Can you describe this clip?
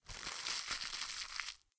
Crumpling Plastic
Plastic or cellophane rustling and being crumpled.
rustle, rustling, crumpling, crumple, plastic, cellophane